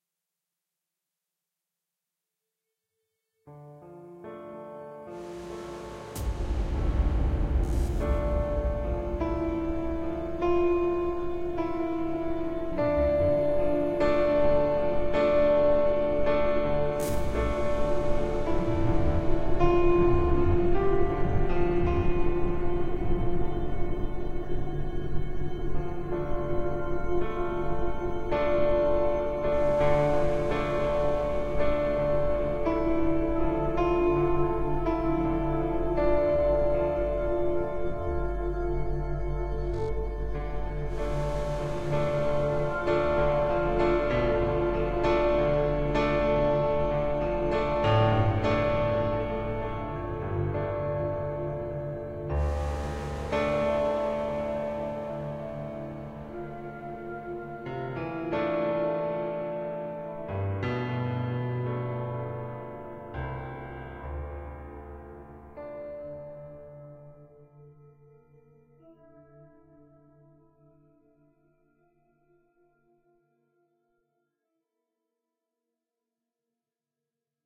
Cinematic melody with electronic sounds.